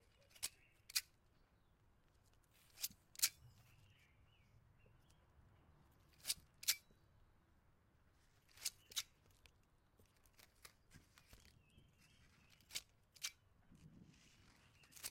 A pair of garden sheers cutting into a small rose garden on a cloudy day outside in a garden. Recorded on a Zoom H6 portable digital recorder, rifle micophone